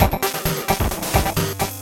hesed&tks3(33)
drum, 707, loop, modified, bend, beat